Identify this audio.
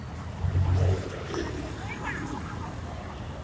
Registro de paisaje sonoro para el proyecto SIAS UAN en la ciudad de Palmira.
registro realizado como Toma No 05-ambiente 2 parque de los bomberos.
Registro realizado por Juan Carlos Floyd Llanos con un Iphone 6 entre las 11:30 am y 12:00m el dia 21 de noviembre de 2.019
2, 05-ambiente, No, Proyect, Sonoro, Palmira, Of, Soundscape, Paisaje, Sounds, SIAS, Toma